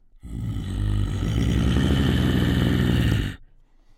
Monster growl 8
A monster/zombie sound, yay! I guess my neighbors are concerned about a zombie invasion now (I recorded my monster sounds in my closet).
Recorded with a RØDE NT-2A.
Apocalypse, Creature, Dead, Growl, Horror, Invasion, Monster, Monsters, Scary, Scream, Zombie